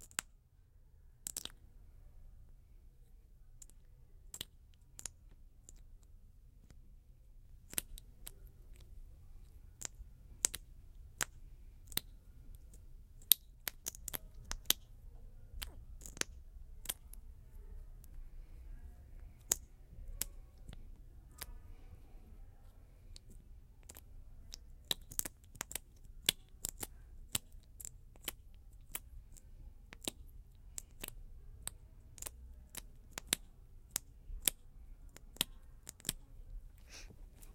Som de um gloss labial ao ser aberto
Sound of a lipgloss when opened
Gravado para a disciplina de Captação e Edição de Áudio do curso Rádio, TV e Internet, Universidade Anhembi Morumbi. São Paulo-SP. Brasil.